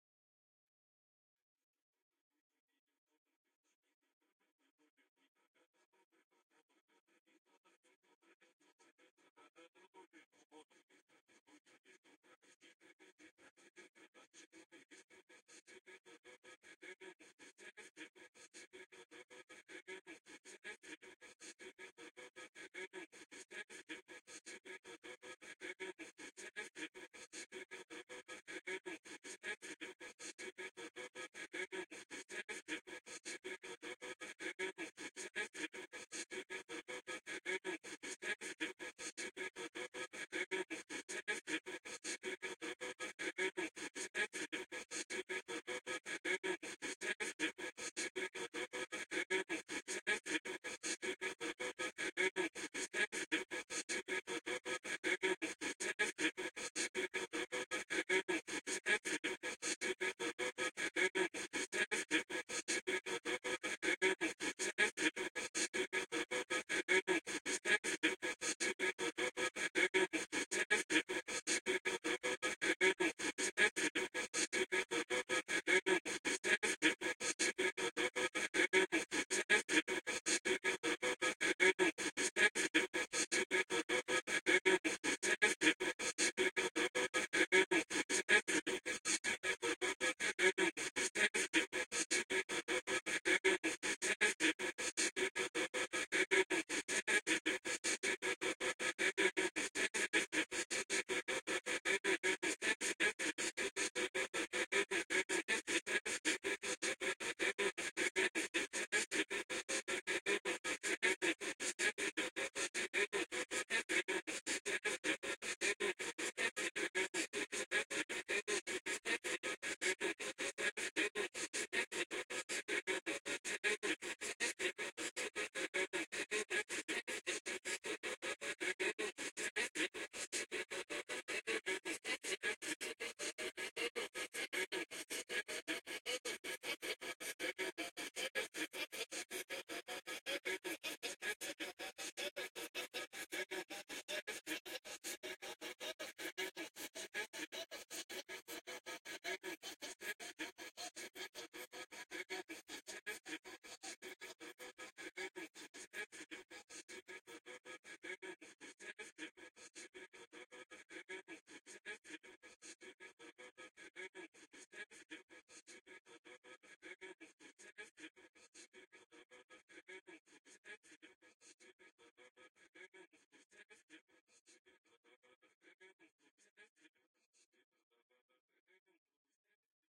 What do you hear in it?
sample to the psychedelic and experimental music.
AmbientPsychedelic, ExperimentalDark, Noise